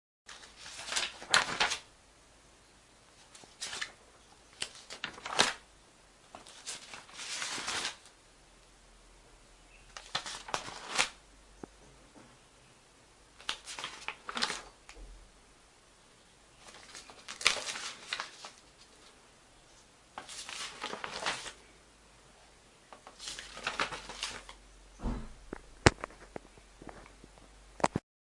page turn paper SFX
The sound was recorded at the office, with my Samsung Galaxy II sound recorder.
Just took some papers and flipped them several times in order to have different kinds of page-turn sounds.
flip, paper, turn, newspaper, page-turn